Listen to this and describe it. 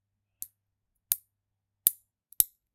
Menu Click sound mixed in Audicity.